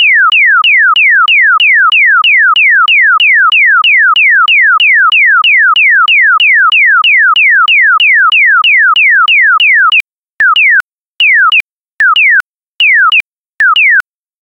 Clear sound of the acoustic signal from most crossing pedestrians in Madrid (Spain). Recreated on Audacity.